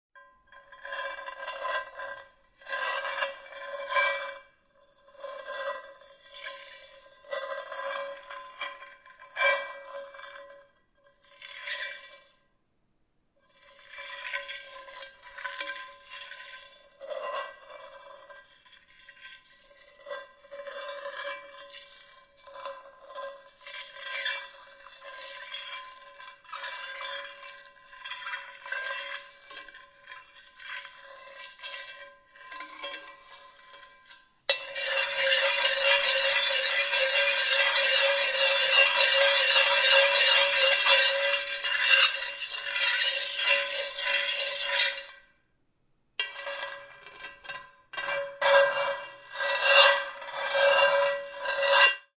Scraping Metal
Metallic noises made by scraping an old and rusty cast-iron skillet using a fork. Variations available in regards to speed, intensity, and volume.